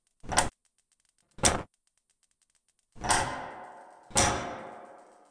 Electric switch click clicking
clicking, Electric, click, switch